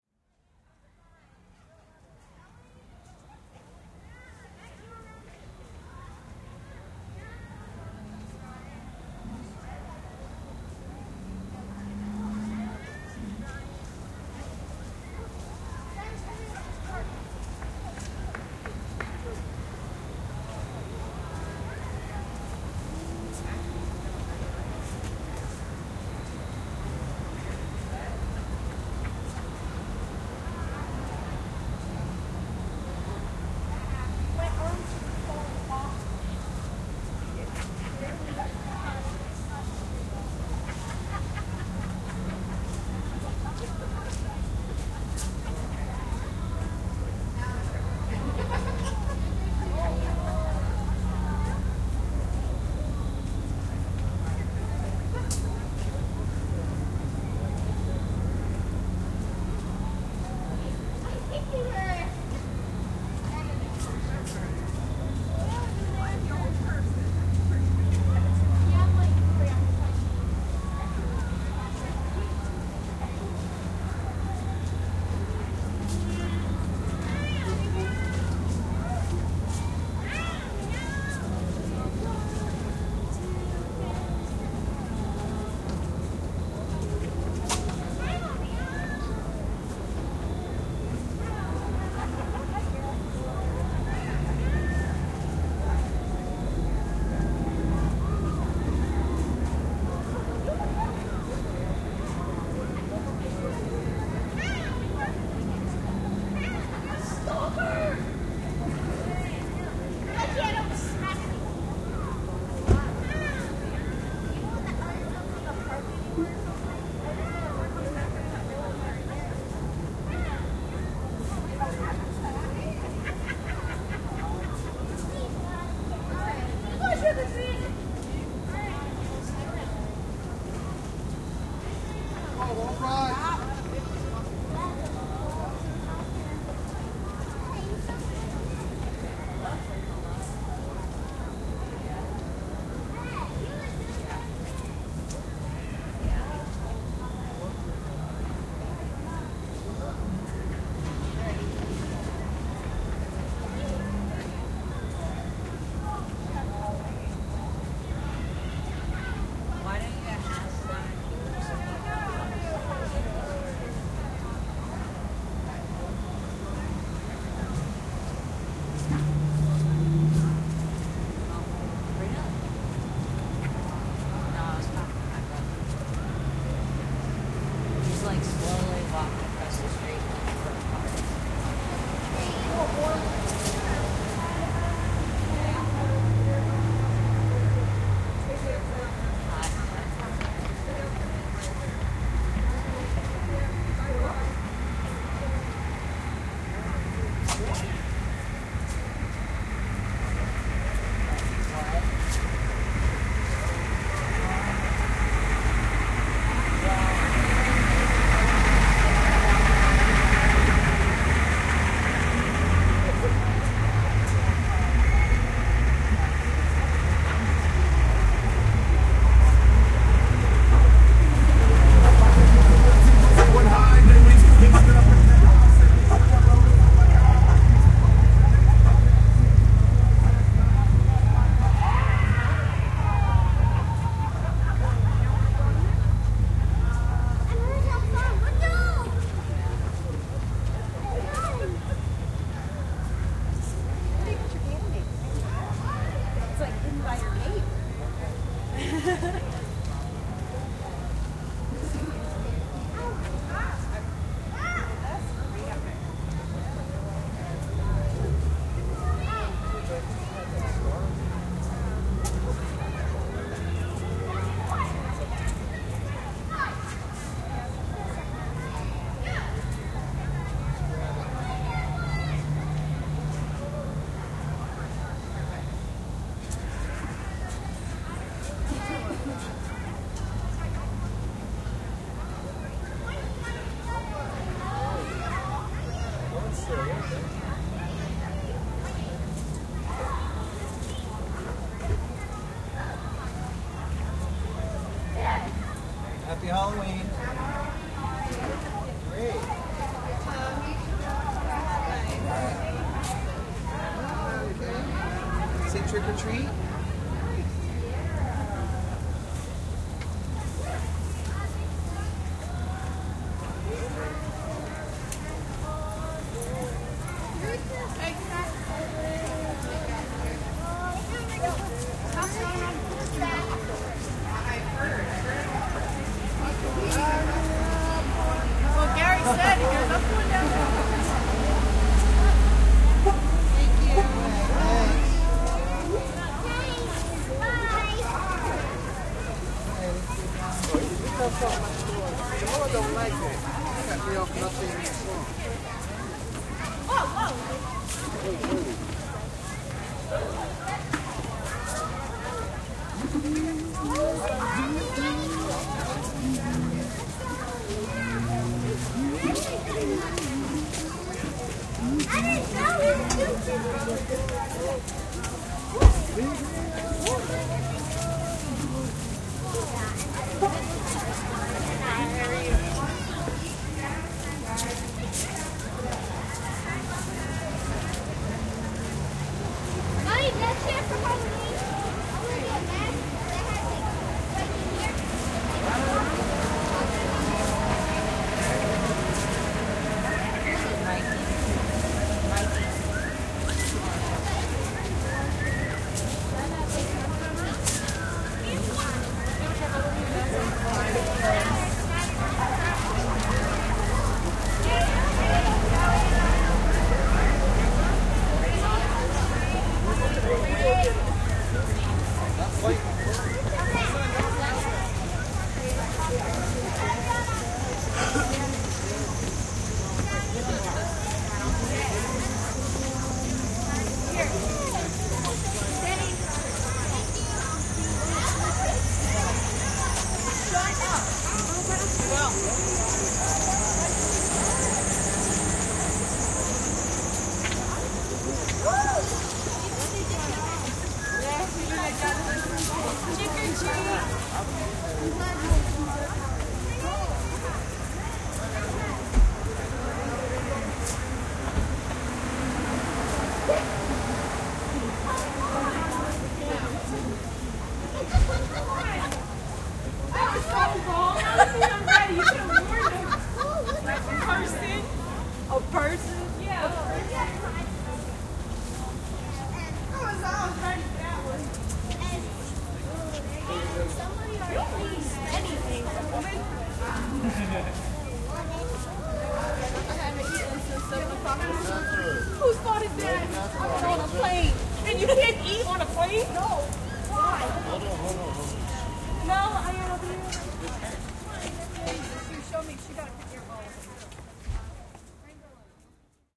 Halloween Street02
Hamilton Park is an old neighborhood just beyond the city limits of a mid-sized Pennsylvania town. It feels suburban but the houses are close together, which makes it ideal for trick-or-treaters.
This recording is a portion of the street sounds of Halloween night. I slung a small stereo mic out of the third story window on the street side of my house. With the stereo field oriented up and down the street it's possible to hear groups of children (and cars) traveling for several blocks.
There are people handing out treats at the house I'm in, the house directly across the street, and several nearby houses, but talking and other sounds from the people directly below are greatly attenuated by porch roofs. The loudest voices are heard from people in the street just in front of the house.
I used the supplied "T" stereo mic on a three-foot long boom propped out the window. There was very little wind, fortunately, as the gain was just about full up.